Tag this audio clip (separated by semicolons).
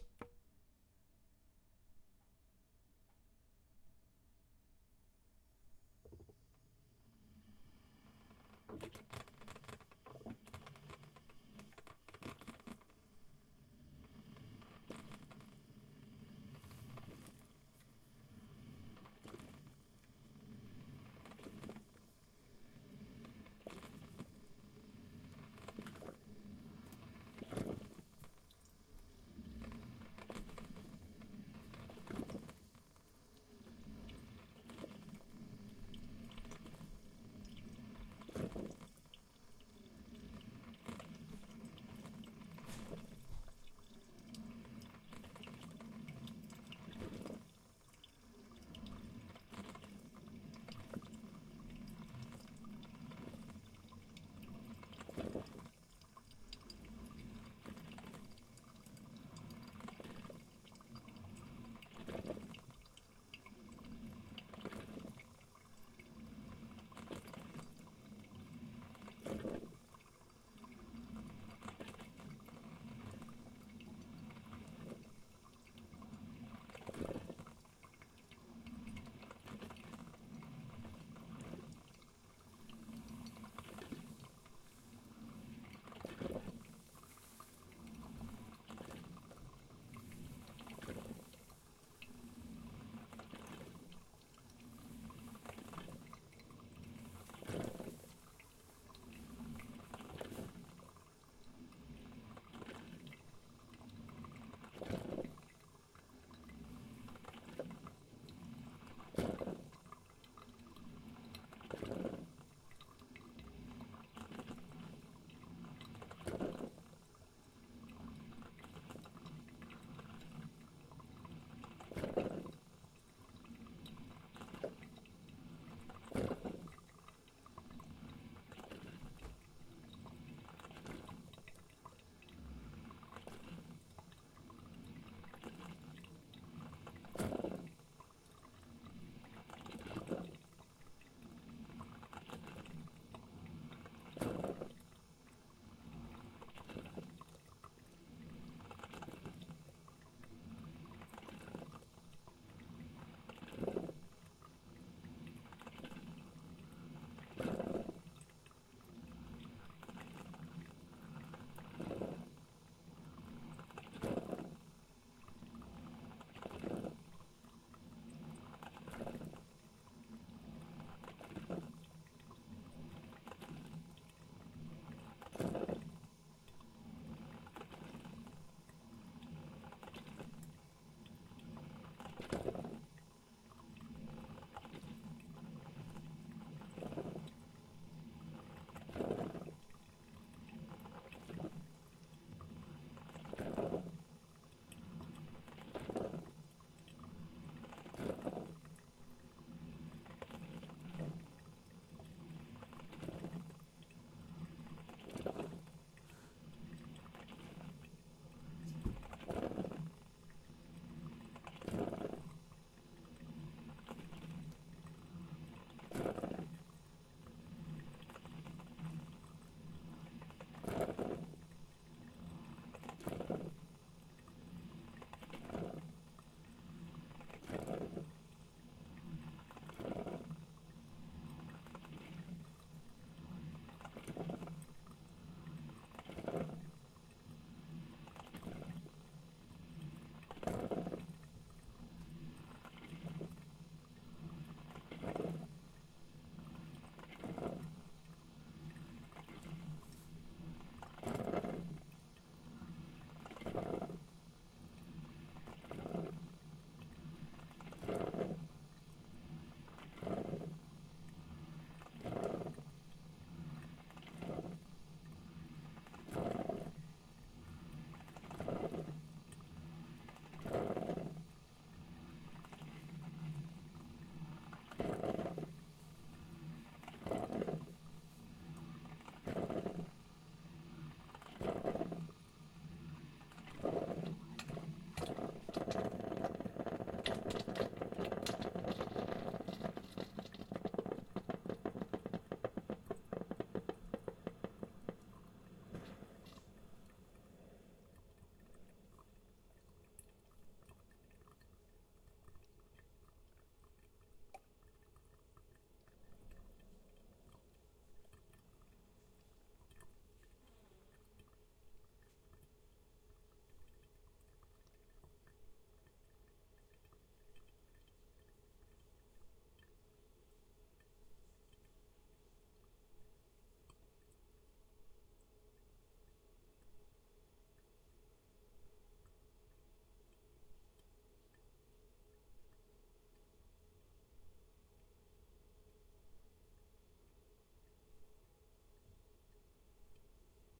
brewing; coffee; liquid